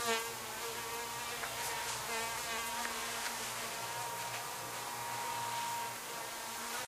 fly flyby filter2
A fly buzzing the microphone ran through band pass filter recorded with Olympus DS-40 with Sony ECMDS70P.
fly, insect